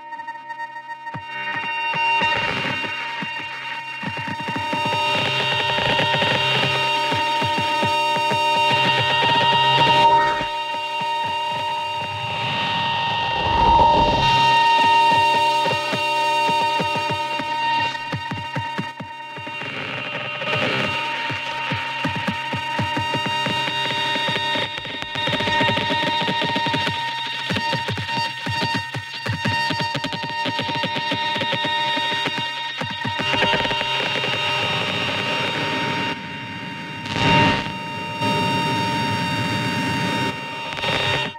Synth Radio distorted morph
Synthesized glitchy radio noise morphing. Distorted, complex.
Can be looped.
complex, distorted, drone, glitch, loop, noise, synth, unstable